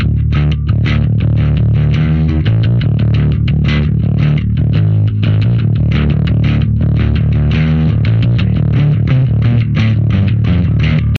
metal bass phrase 1
metal bass phrase